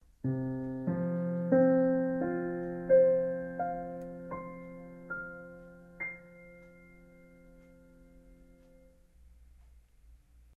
Mix of different piano sounds
mixture, music, piano, random, sounds